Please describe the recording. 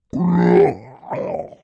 These are all me making terrible grunting growling snorting non-words for an offstage sea creature in a play but it could be anything monster like. Pitched down 4 semitones and compressed. One Creature is a tad crunchy/ overdriven. They sound particularly great through the WAVES doubler plugin..
creature, snorting, voiceover, monster, vocal, voice, human, slurping, grunting, growling, beast, non-verbal